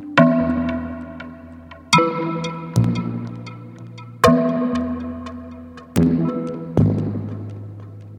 Recordings of a Yamaha PSS-370 keyboard with built-in FM-synthesizer